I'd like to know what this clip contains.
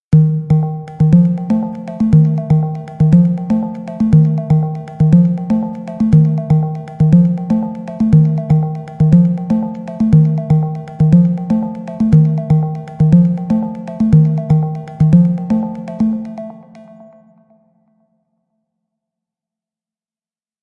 little loop arpeggio i made with a non sample based softsynth in live.
danny, food, free, mellow, synth